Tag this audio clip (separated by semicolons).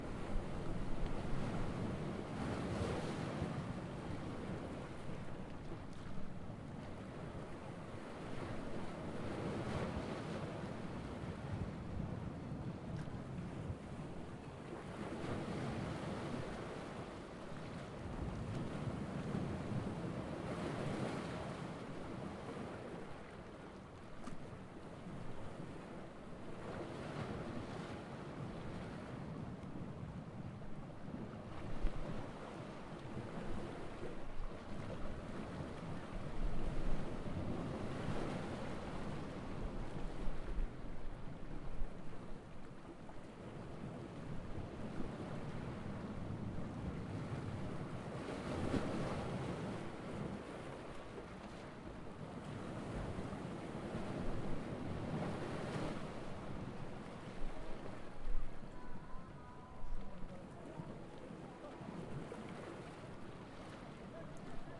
ambience; beach; rocks; field-recording; stereo; soundscape; bay; shoreline; mediterranean; sea; water; ambient; waves; nature; ocean